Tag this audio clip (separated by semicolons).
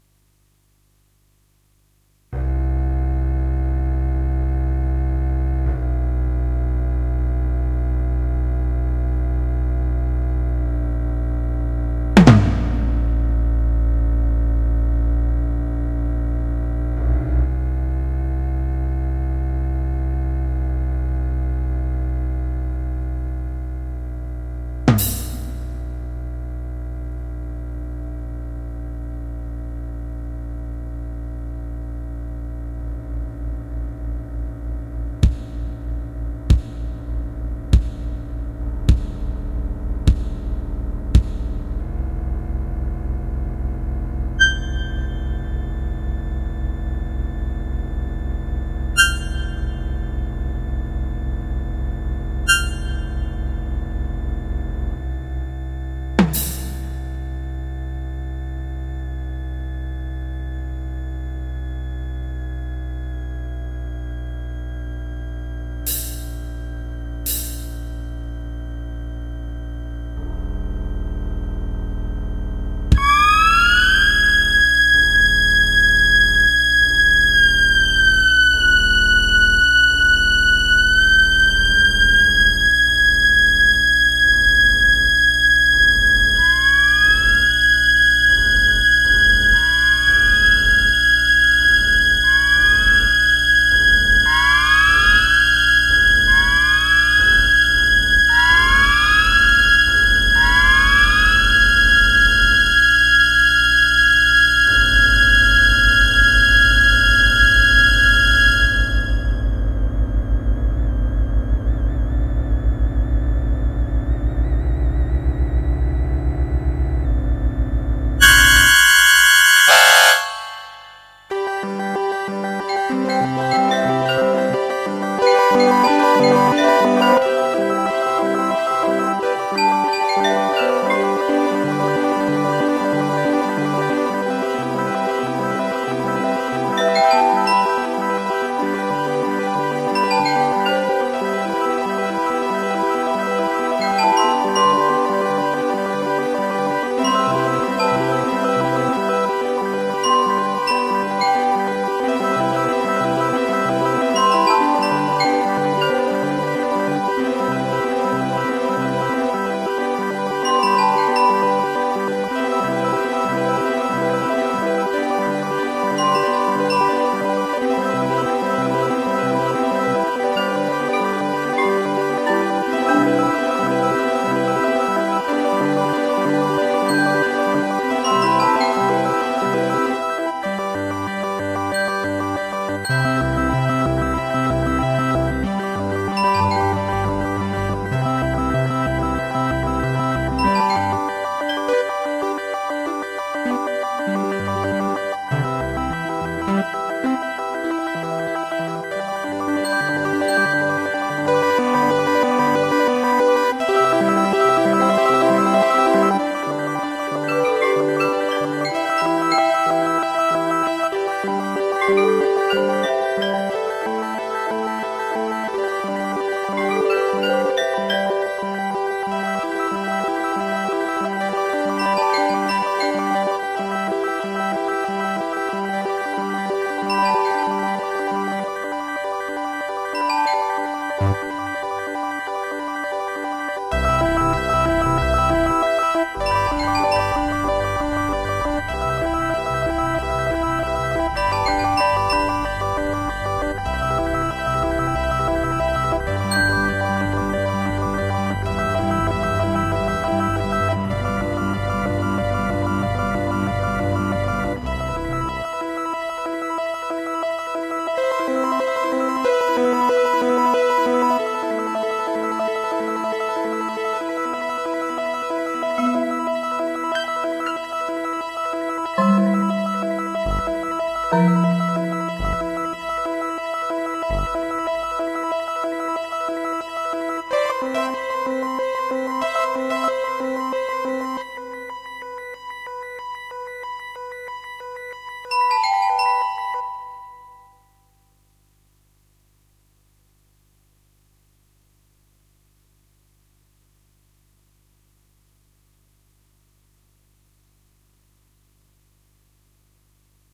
alien; android; artificial; automation; bionic; computer; computerorgasm; cyborg; data; droid; electronic; gadget; galaxy; game; intelligent; interface; mechanical; robot; robotic; space; spacehip; spaceship; speech; talk; voice; widget; word